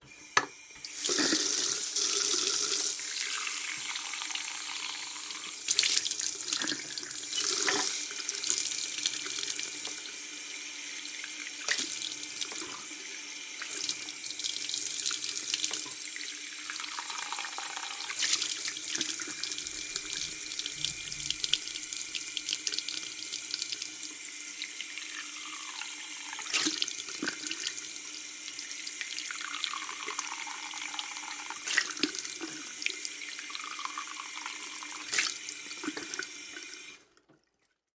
water run into cupped hands from bathroom tap
bathroom, cupped, from, hands, into, run, tap, water